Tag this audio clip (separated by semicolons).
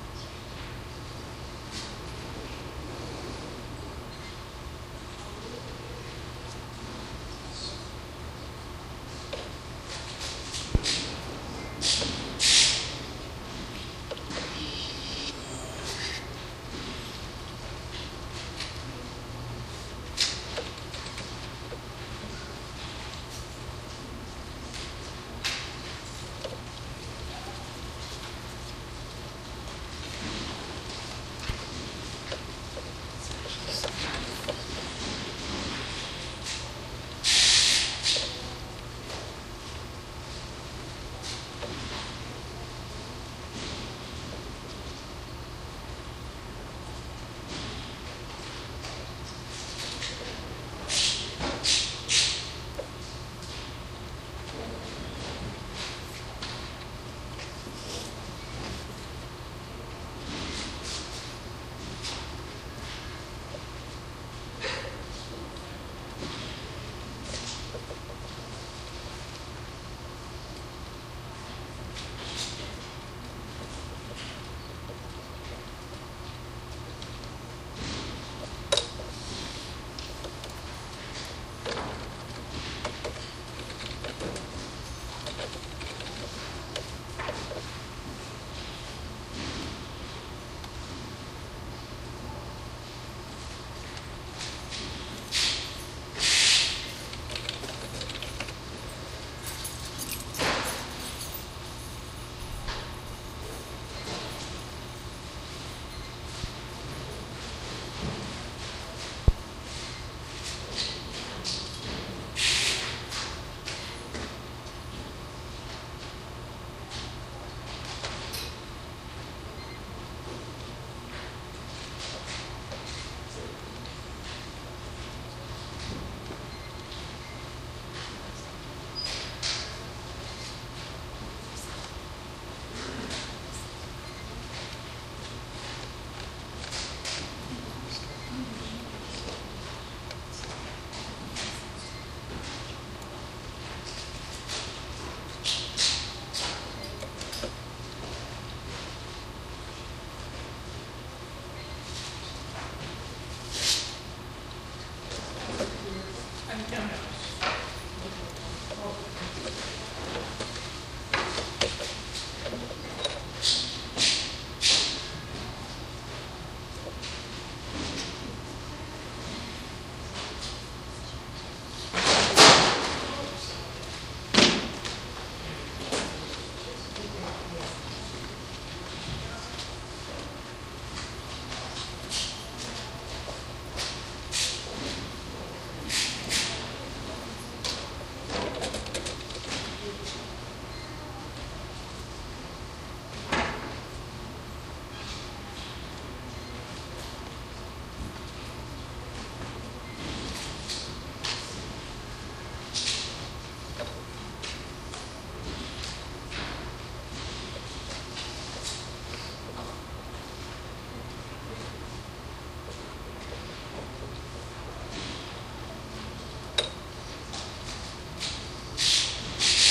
ambient; field-recording; library; stereo